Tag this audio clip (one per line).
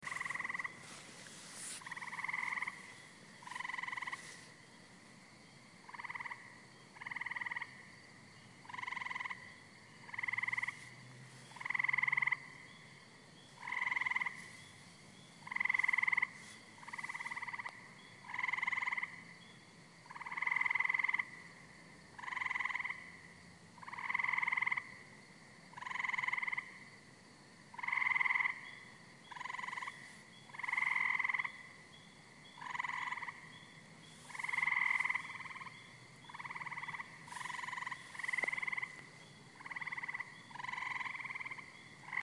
America
field-recording
frog
New-England
night
Northeast
Rhode-Island